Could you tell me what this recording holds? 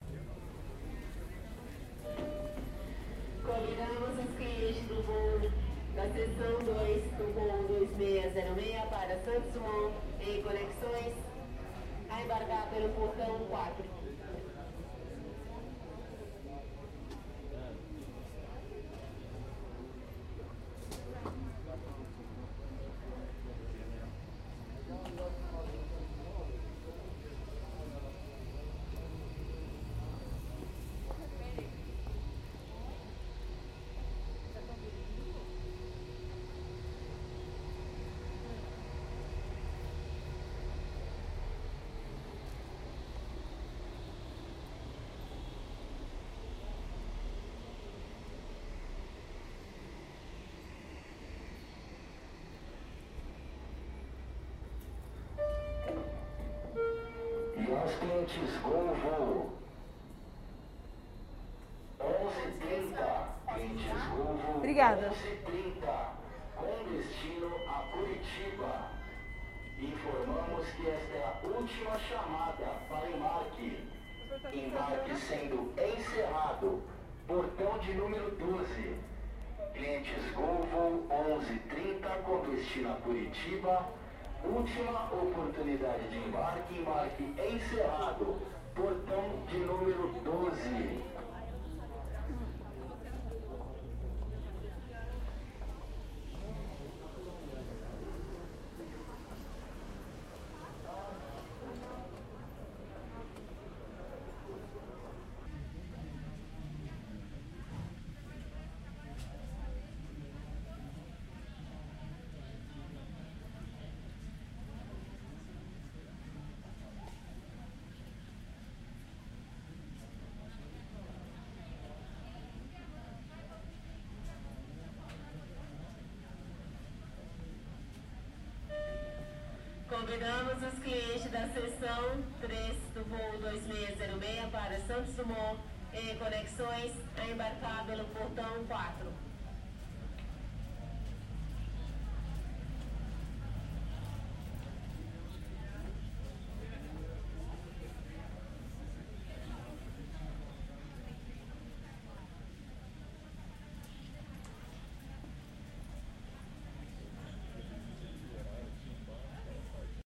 airport ambient sound
ambient sound at São Paulo airport, Brazil
airport, call, flight, sound